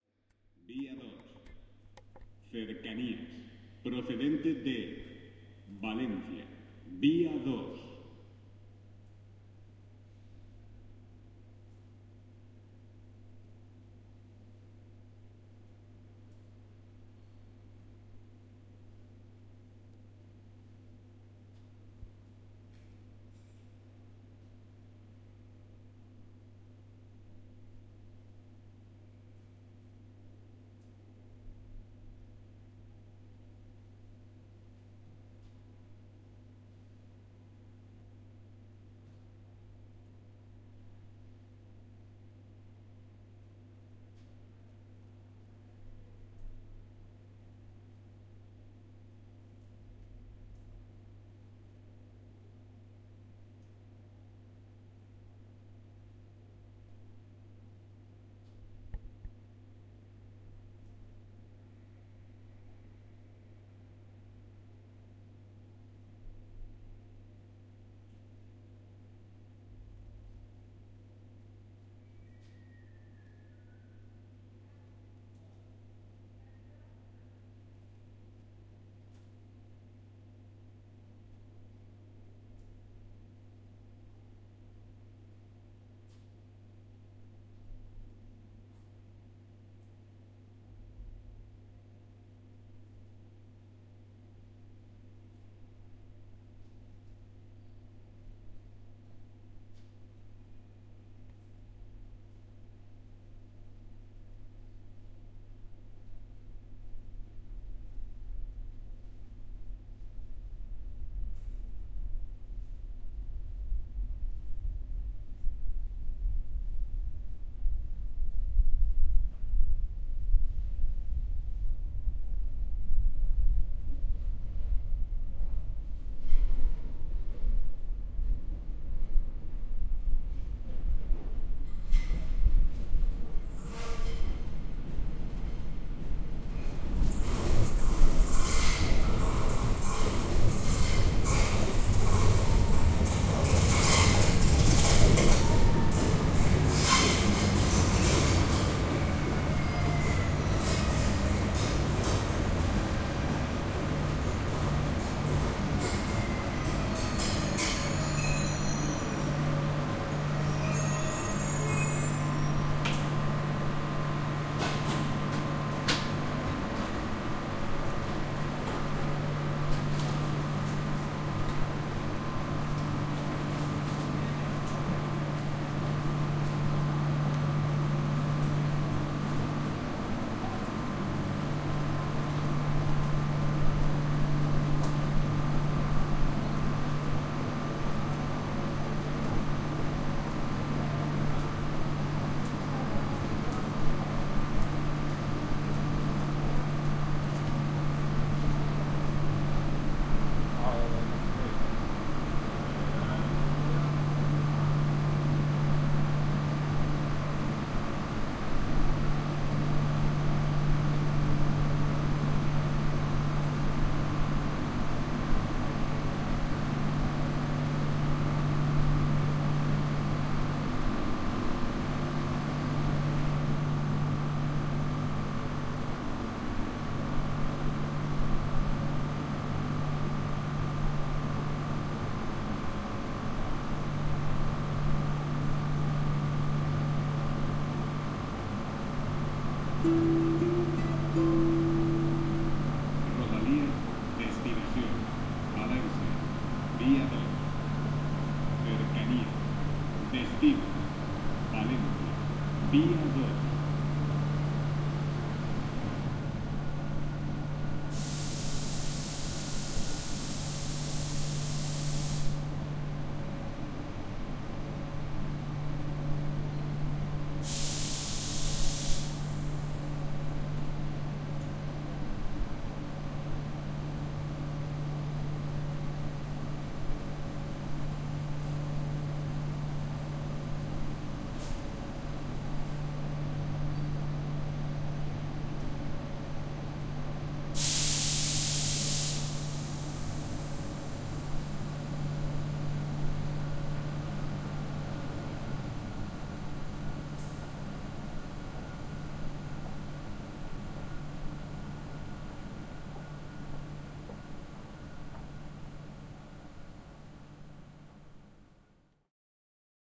Gandia’s train arrival
This excerpt describes the arrival sound of Gandia’s train on afternoon. (Valencia, Spain).
Recorded with binaural Zoom H4n about 19h30 on 13-11-2014